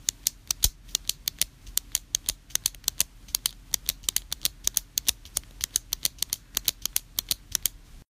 lahlou linda 2016 2017 pen
This sond is a record of "tik" of the pen.